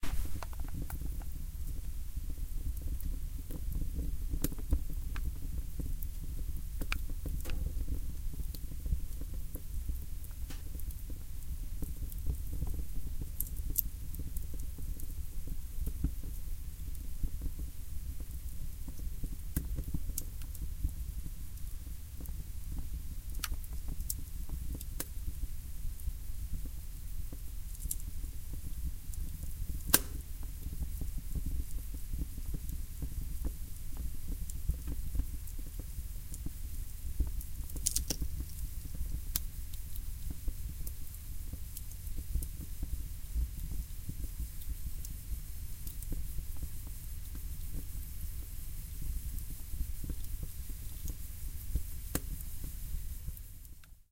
fire cracking 03
Fire cracking in my fireplace
Home, Fireplace, Fire